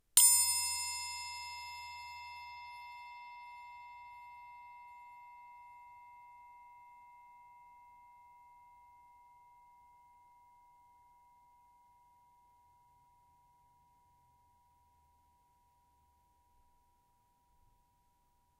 Punch to music triangle.
Recorder: Tascam DR-40.
Internal recorder mics.
Date: 2014-10-26.
musical, punch, triangle